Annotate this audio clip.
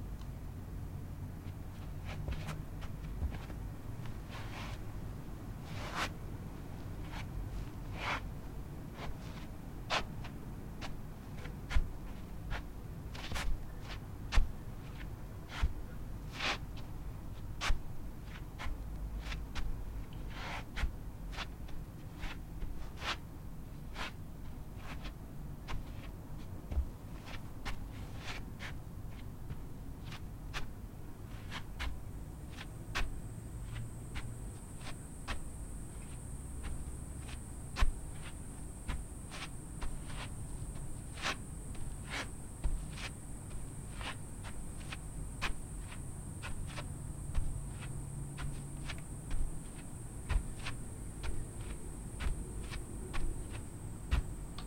Sandy Footsteps and scrapes
Walking sounds through sand
feet, foot, footstep, footsteps, sand, step, steps, walk, walking